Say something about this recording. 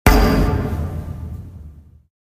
VSH-35-knuckle-rattle-metal pipe-short
Metal foley performed with hands. Part of my ‘various hits’ pack - foley on concrete, metal pipes, and plastic surfaced objects in a 10 story stairwell. Recorded on iPhone. Added fades, EQ’s and compression for easy integration.
crack, fist, hand, hit, hits, human, kick, knuckle, metal, metallic, metal-pipe, metalpipe, percussion, pop, ring, ringing, slam, slap, smack, thump